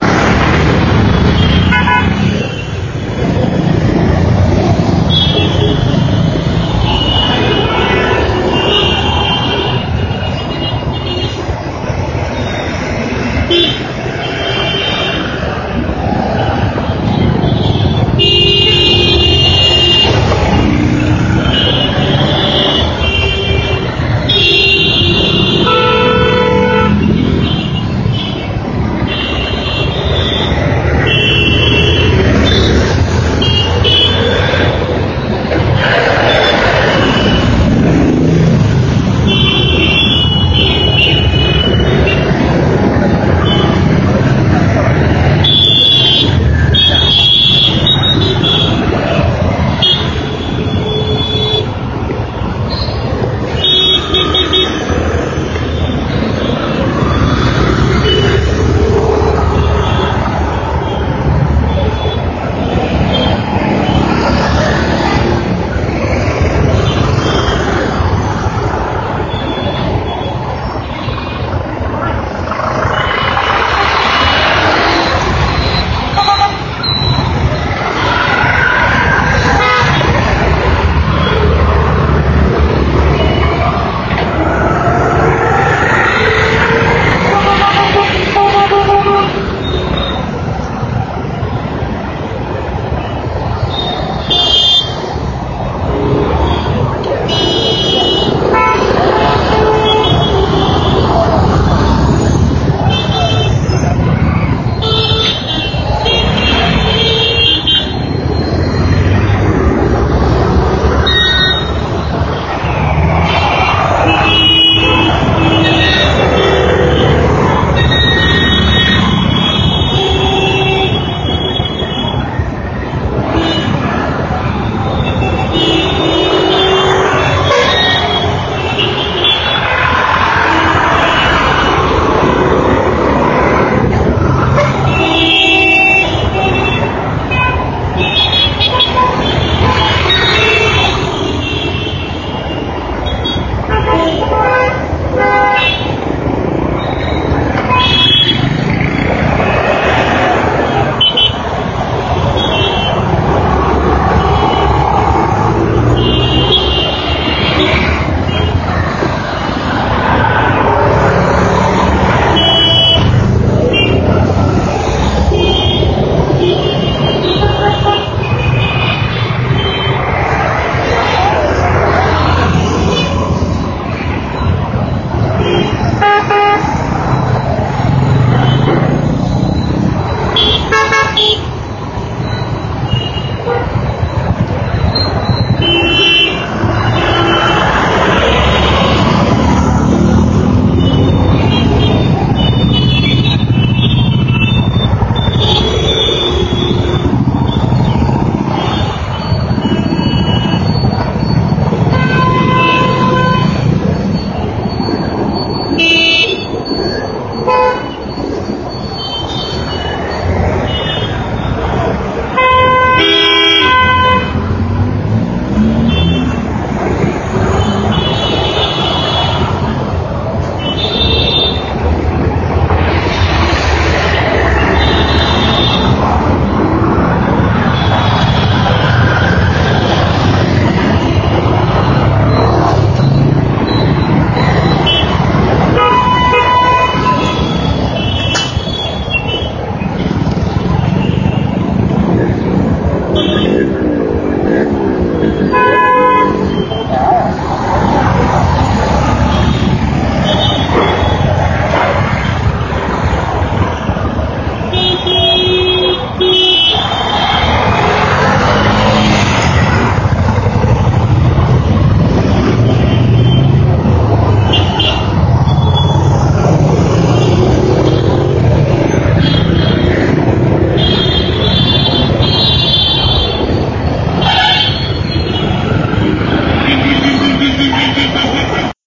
Evening traffic at JP Nagar, Bangalore
city, honk, india, street, traffic